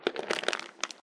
Slow Pebble Tumble
Foley for a bug scurrying along rocks in a glass tank. Slow pebble tumbling sound. Made by shaking a plastic jar of almonds.
bug, creature, dice, falling, Foley, jar, nuts, pebbles, rocks, rubble, scraping, scurry, shake, shuffle, sift, sound, terrarium, toss